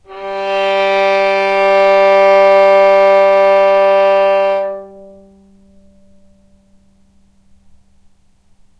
violin arco non vib G2
violin arco non vibrato